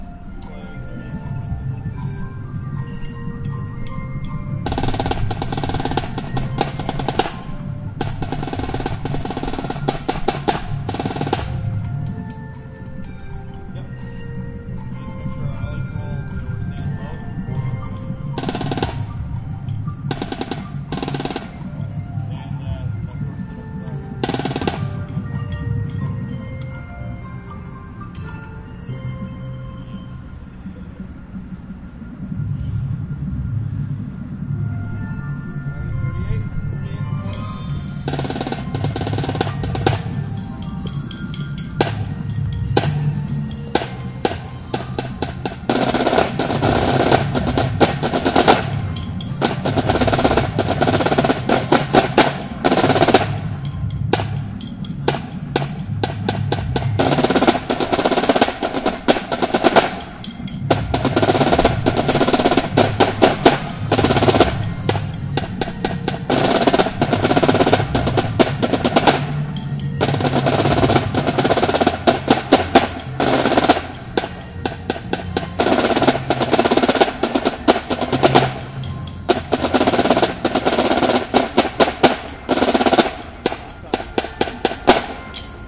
Prominent Snares
Snare drums practicing.
ambience, band, band-practice, cacophonous, college, football, music, noisy, percussion, percussive, practice, practicing, prominent, prominent-snares, snares